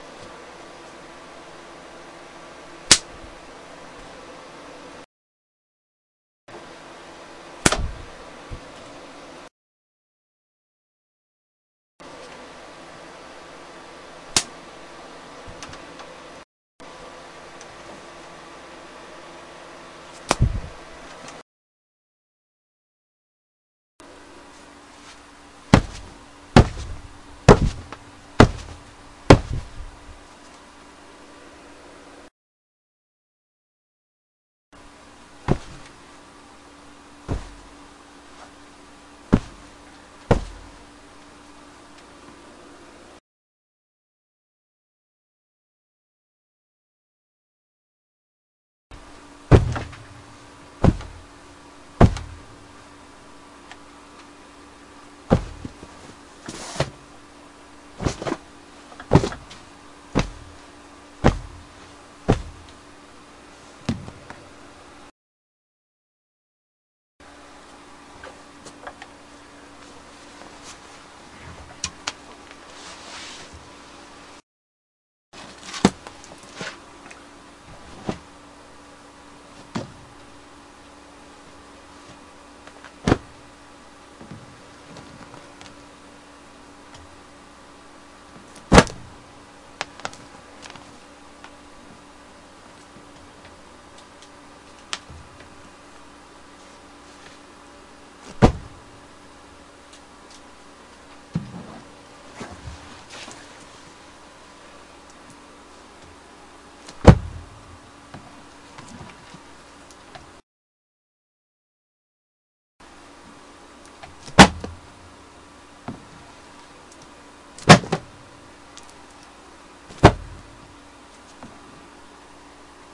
Tom Punch sounds
Sounds of me punching my leg, hitting my palms together and punching books under a pillow. These work well as a layer on top of other existing punch sounds on this website to make a punch sound more fleshy.
combat, fight, kick, punch